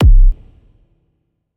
dance kick4
Produced with Jeskola Buzz. Generated from kick synth with distortion, re-verb and equalization added.
bass-drum, bassdrum, drum, kick